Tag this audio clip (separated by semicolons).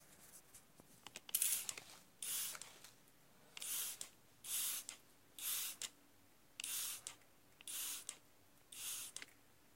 insecticide; liquid; perfume; spray; Sprinkle; water